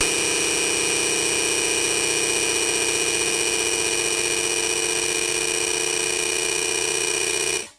basic hihat noise
casio, phase, hihat, pd, distortion, vz-10m